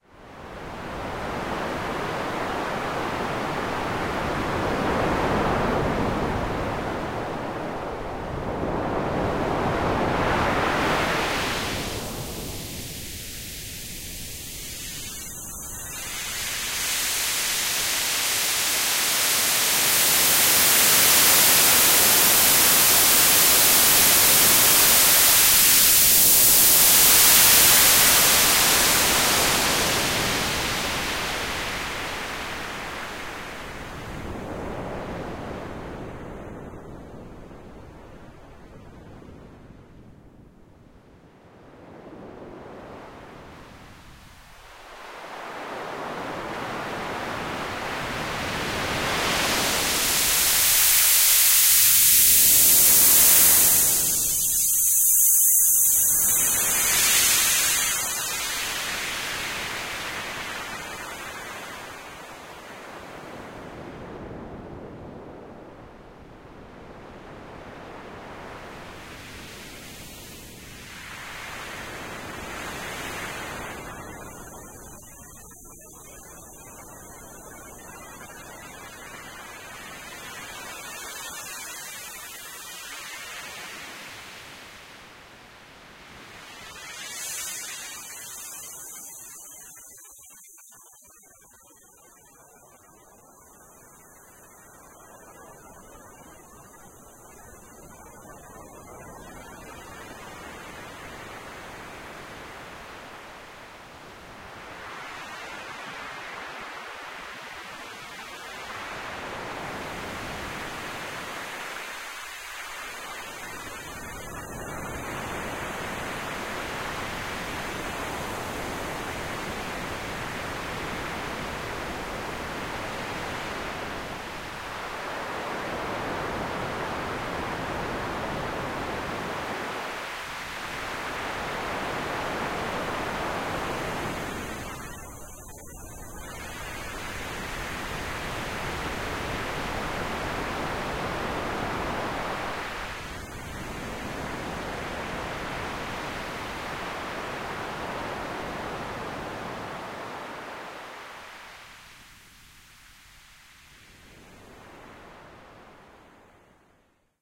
filt-noise01 juppitersea84
space sea effect made in puredata filtering a white noise source
sample, filter, sea, puredata, noise, bandpass